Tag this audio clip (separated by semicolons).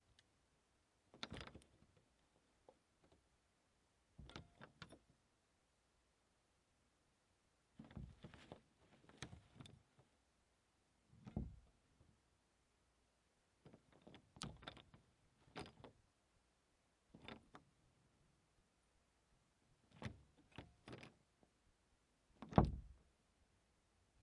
house; foley